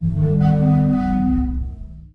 Giant breathing 1
One in the series of short clips for Sonokids omni pad project. It is a recording of Sea organ in Zadar, spliced into 27 short sounds. A real giant (the Adriatic sea) breathing and singing.
sea-organ, field-recording, giant, breathing, sonokids-omni